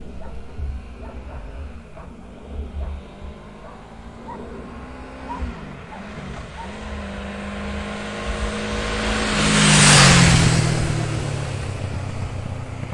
Motorcycle passing by (Honda CBF500) 3
Recorded with Tascam DR-40 in X-Y stereo mode. Good, high quality recording. Dogs barking in the background.
engine, field-recording, honda-cbf500, moto, motor, motorcycle, stereo, tascam